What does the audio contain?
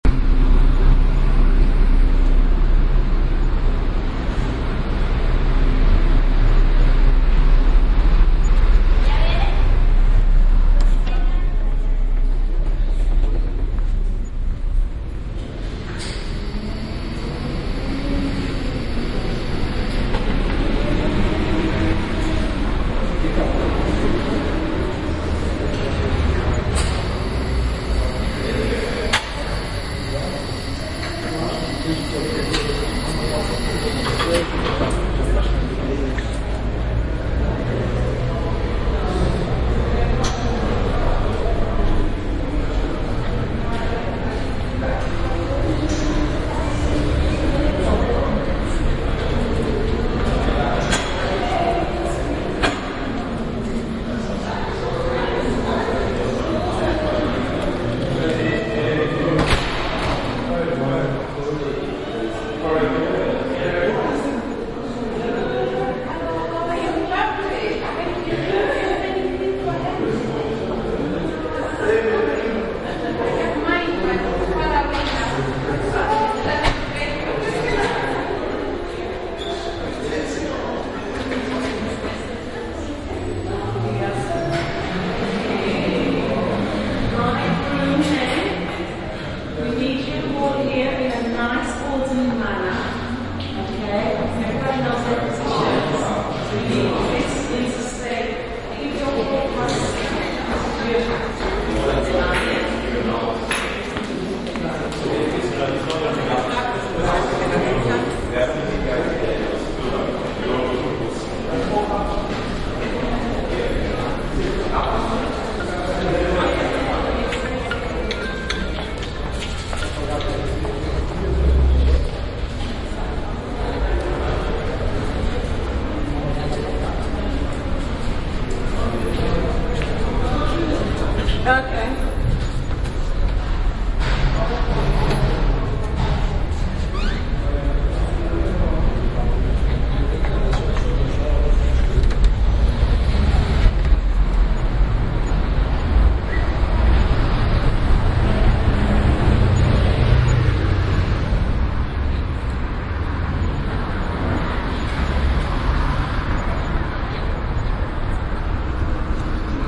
Finsbury Park - Inside the UCKG
background-sound, ambience, field-recording, ambiance, general-noise, atmosphere, city, soundscape, london, ambient